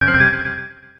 I made these sounds in the freeware midi composing studio nanostudio you should try nanostudio and i used ocenaudio for additional editing also freeware
application, bleep, blip, bootup, click, clicks, desktop, effect, event, game, intro, intros, sfx, sound, startup